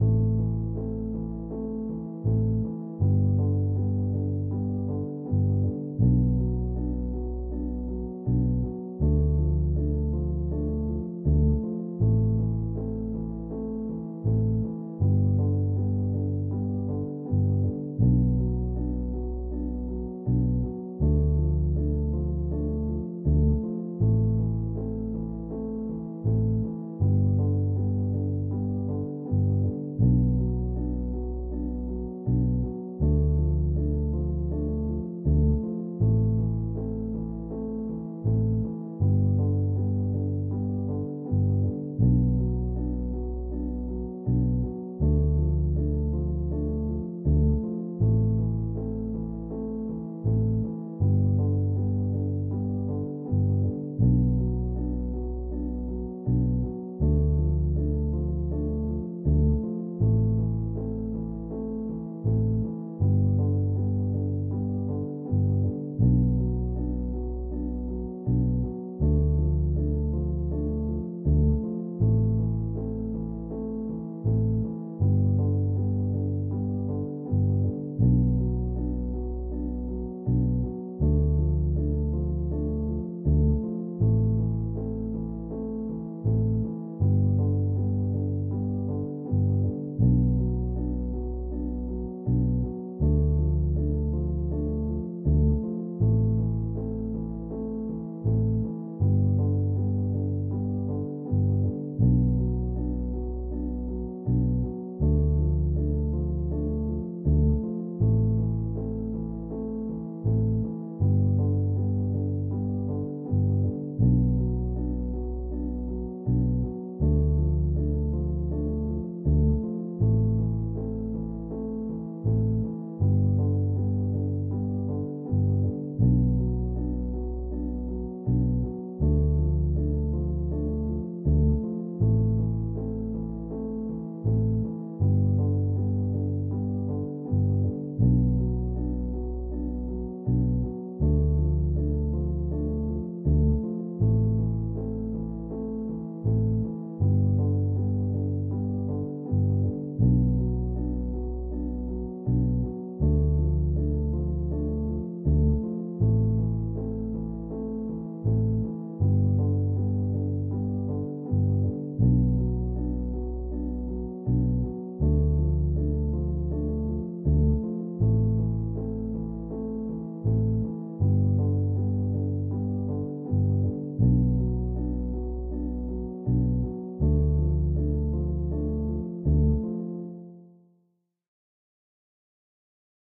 Dark loops 054 simple mix version 1 80 bpm
80bpm, bpm, loops